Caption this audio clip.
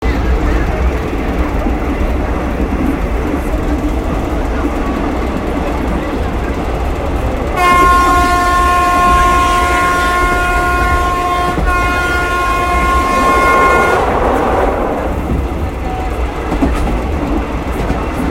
Took a train from Thailand to Malaysia, passing by bushes and train honks